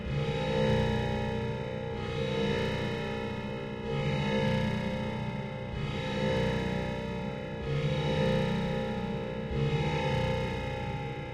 02 Ele Loop
cinematic, dramatic, dynamic, effect, filter, fx, heroic, improvised, loop, movie, new, quantized-loop, reverb, rhythm, sad, slow, soundtrack, sountracks, trailer